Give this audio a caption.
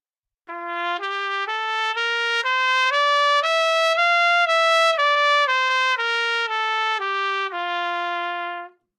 Part of the Good-sounds dataset of monophonic instrumental sounds.
instrument::trumpet
note::Csharp
good-sounds-id::7341
mode::major